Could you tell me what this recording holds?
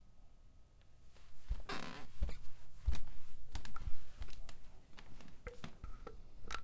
Walk Across Floor
Walking across a hardwood floor.
abstraction, FND112-ASHLIFIORINI-ABSTRACTION, syracuse